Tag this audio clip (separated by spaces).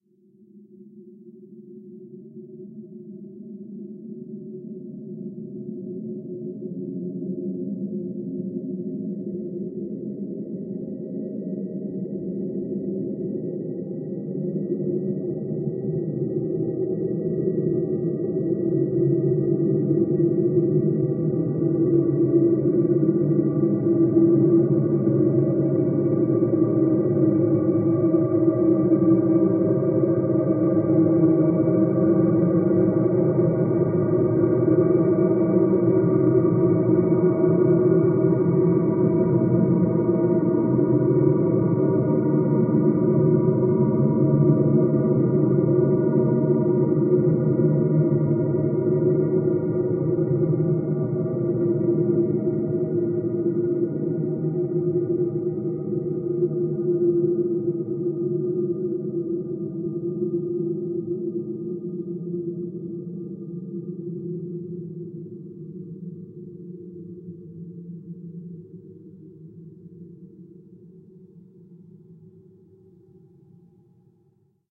crescendo dark drone expanse icy large long mechanical pause scary sci-fi ship space time whoosh